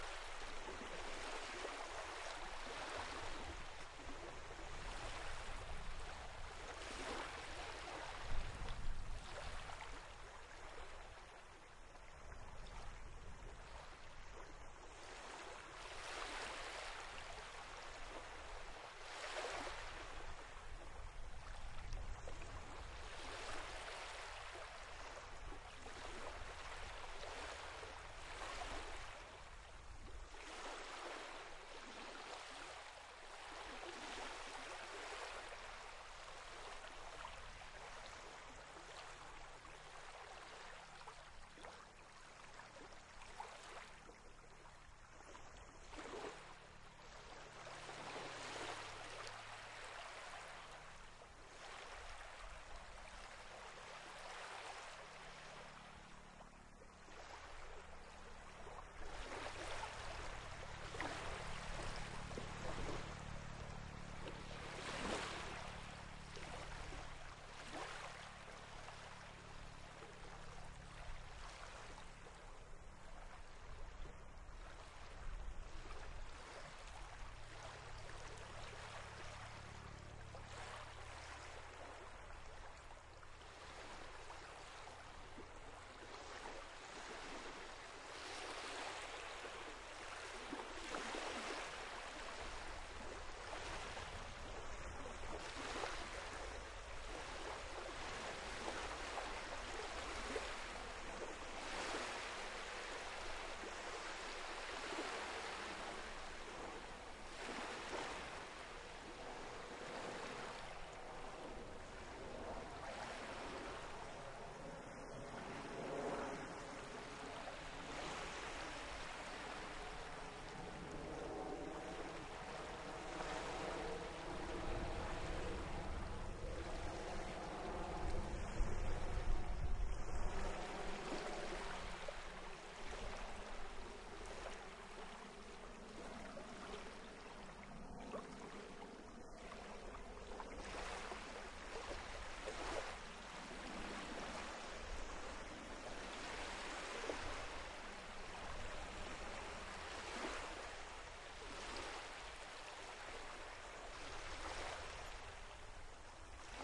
waves and that helicopter
The beach near Marbaek. Not high waves, but still one of my favourite
spots, as it is pretty peacefull there. During the recording a
helicopter flew over (but not near, where I was standing). A dose of
"Local Hero", a brilliant film, where a helicopter lands on the
beach...nothing exciting, but great in the film. Recorded with an AudioTechnica microphone AT835ST, a Beachtek preamp and an iriver ihp-120 recorder.
sea, wind, seaside, helicopter, waves, beach, field-recording, denmark